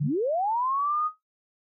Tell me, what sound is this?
Gliding Beep long
A long bleep gliding upwards, practical for comic and/or gaming purposes.